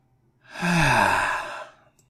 An outward satisfied exhale
breath, breathe, exhale, out, sigh